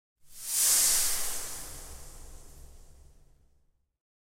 whoosh noise
Whosh for animations
movement, whoosh, noise